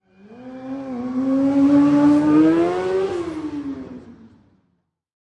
Recorded at an auto show in Dallas, TX. This is one of the few usable clips from the motorcycle stunt/trick show they had. So many yelling children haha. Apologies if the crowd noise is too apparent, I did the best I could at the time!
Recorded on a ZOOM H2 set to stereo.